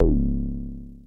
kick square long
I recorded these sounds with my Korg Monotribe. I found it can produce some seriously awesome percussion sounds, most cool of them being kick drums.
analog, bd, drum, kick, monotribe, percussion, square, square-wave